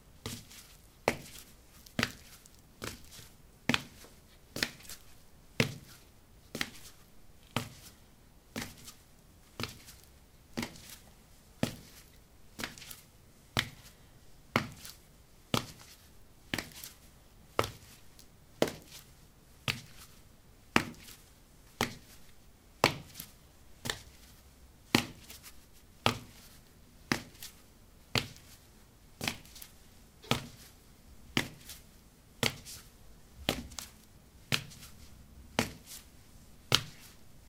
ceramic 04a sandals walk
Walking on ceramic tiles: sandals. Recorded with a ZOOM H2 in a bathroom of a house, normalized with Audacity.